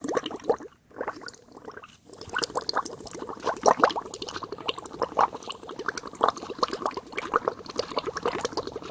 Blowing bubbles into a cup of water through a cheap plastic straw.